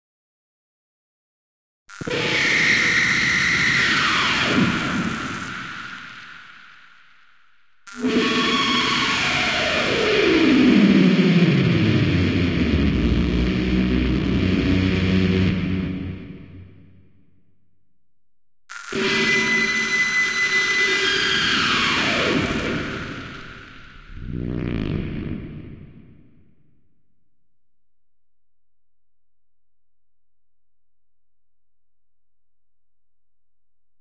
Tesla Monster - Growl
A bunch of sounds I came up while fiddling around on my synths..
Sounds almost like some electronic monster, creature or something like that to me.
aggressive, amp, amplified, creature, dissonance, distortion, dramatic, electric, electronic, growl, guitar, high, horror, massive, monster, screechy, stinger, synthetic